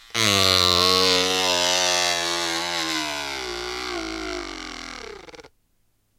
MotorDieing-Plane
small motor against paper, sounds like a motor about to go out.